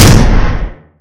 This is sound of Minigun firing.
It is created using Schweppes Can, and edited in Audacity.
You can use this sound in any game where there is Minigun. For example, mods for Doom 3.